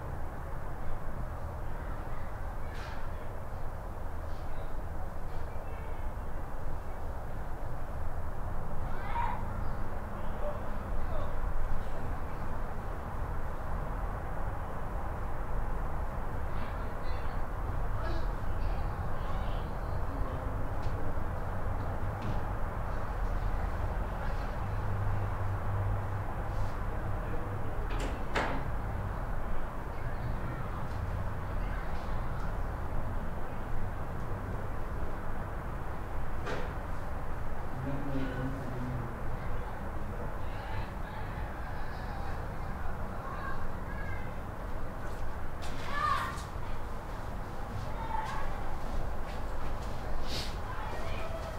ambient house room tone open window distant noises neighbours
house, open, room, tone